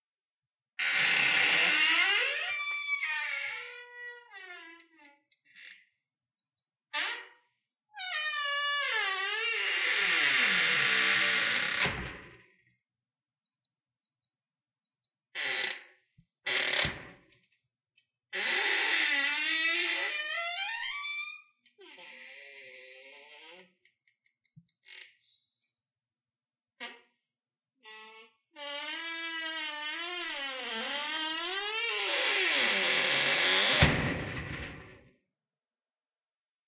squeaky door hinge open and close 2
This is from my security screen door before applying lubricant to get rid of the creak/squeak. I used my Zoom H2n and then removed the ambient noises with Adobe Audition.
creak, creaking, creaky-door, metal-screen-door, squeak, squeaking, squeaky-door